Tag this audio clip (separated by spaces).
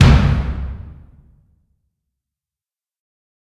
bang bass bomb boom cinematic couch detonation explosion explosive hit impact rise riser rising shockwave shot sounds